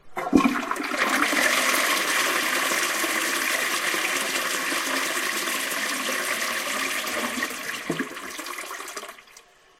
toilet flush
A digital recording of someone elses toilet.....
toilet, flush, OU, T156